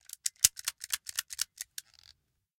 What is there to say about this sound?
Rapid, empty trigger pull.
gun, pull, rapid, revolver, trigger